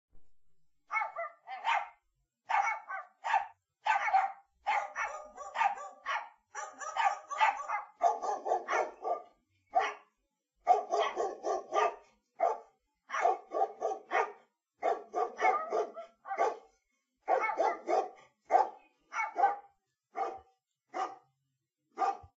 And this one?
Multiple dogs barking, recorded with zoomH6